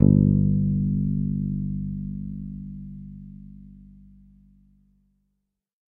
First octave note.
guitar, multisample